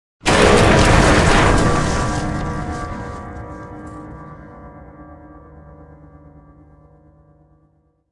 Piano crash
Just goes to show how much I care for my neighbor's bad piano playing. Just kidding...Anyway, I used samples from alexrigg, secretagentgel, and patchen.
boom, break, cartoon, crash, piano, smash